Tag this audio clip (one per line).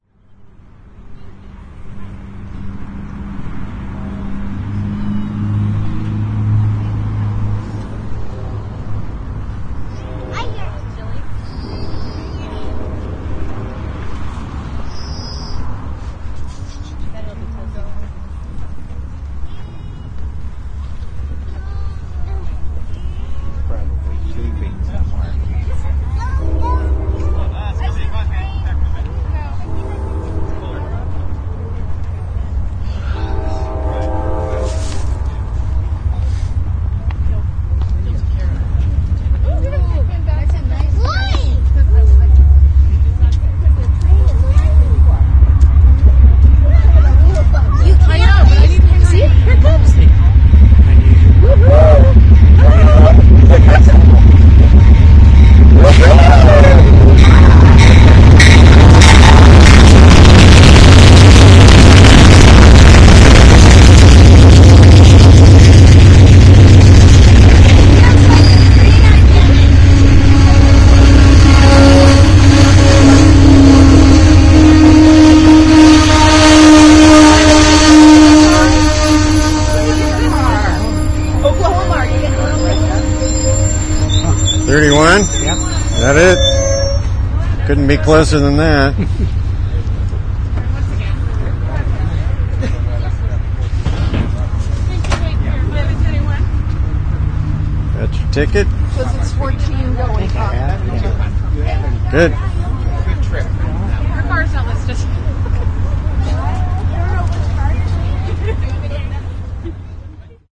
field-recording
outdoor
train